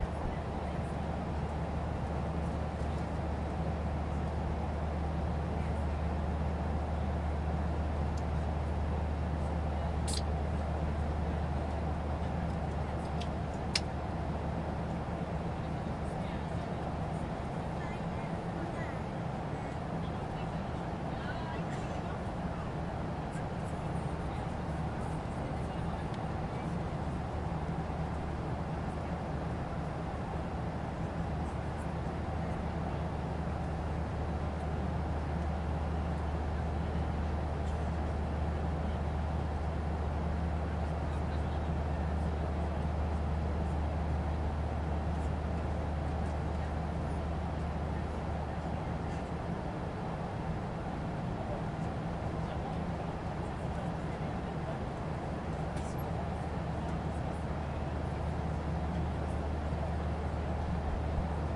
Airplane inflight internal atmos 2
Recorded atmos of internal passenger flight.
Equipment used: Zoom H4 internal mics
Location: On a plane
Date: 29 June 2015
commercial, plane, passenger, Air, flight, int